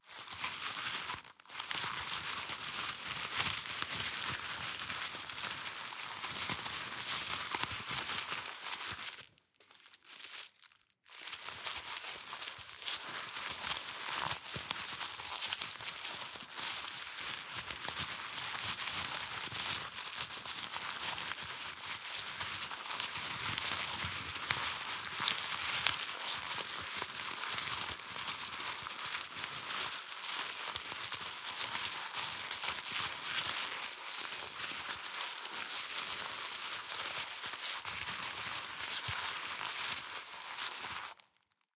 Paper handling, crumpling, friction sfx
Recorded on t.bone EM-700 stereo pair microphones into Zoom H4n Pro Black.